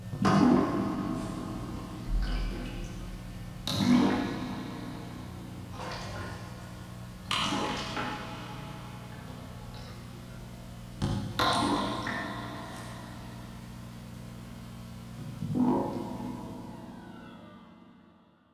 Sampled sound of water dripping in a bucket
night, dripping, dark, water